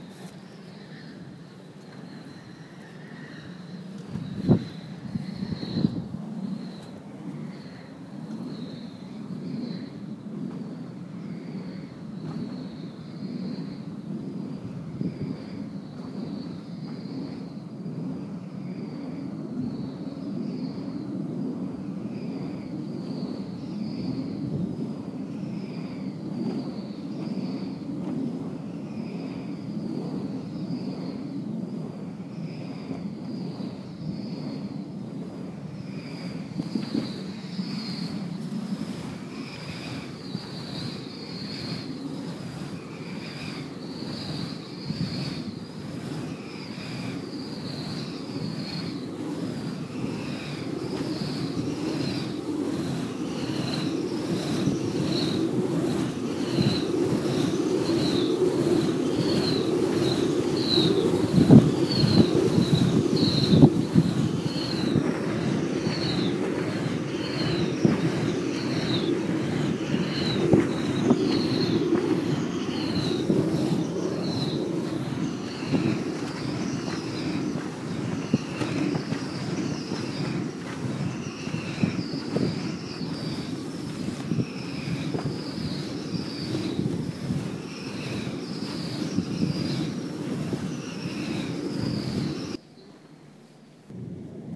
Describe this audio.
Mono recording of a traditional Dutch windmill called De Veendermolen, which is located in Roelofarendsveen (village), Kaag en Braassem (municipality), Noord-Holland (province), Netherlands (country). This time spinning increasingly faster.
Recorded in the summer of 2011 with my iPhone 4 (Blue FiRe app).